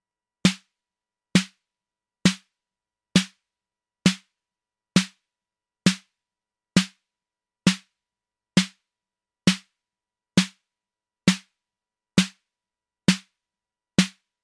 Tiptop SD 133
modular snare tiptop drum
drum, modular, snare, tiptop